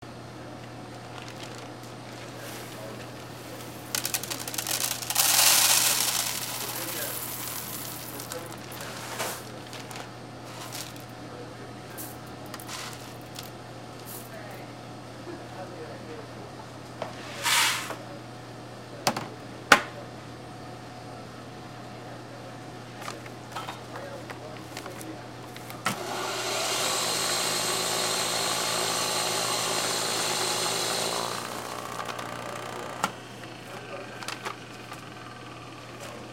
grinding beans
This is a recording of a barista grinding coffee beans at the Folsom St. Coffee Co. in Boulder, Colorado. It includes pouring the beans out of a bag and running the electric grinder.